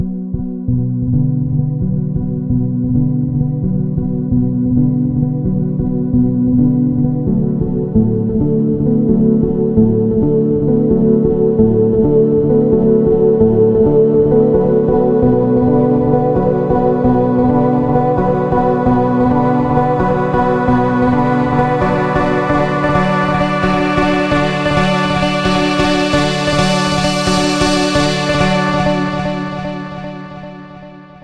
lead synth rise 132bps.